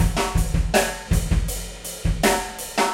Rock beat loop 5 - hiphop ride hangsnare
Kinda hiphopish. Ride cymbal and hanging (late) snare.
Recorded using a SONY condenser mic and an iRiver H340.